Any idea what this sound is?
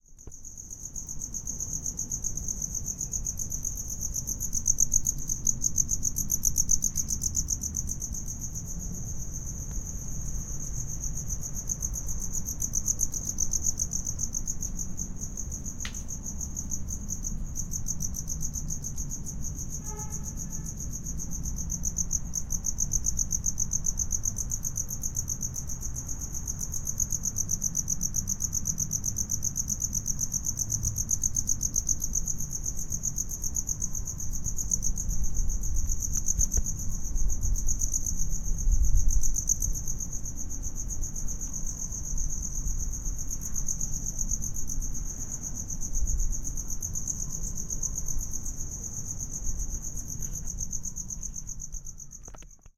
Crickets sounds. While recording,Fanny moves around (swing?.the idea is...experiencing with our body + nature, trying not to use software to create effects.